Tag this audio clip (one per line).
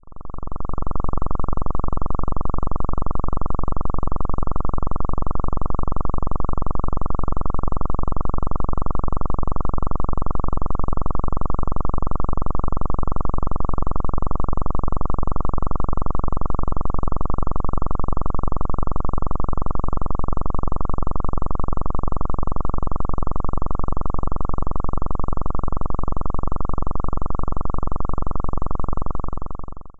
game
radioactive
fallout
radioactivity
wasteland
radiation
nuclear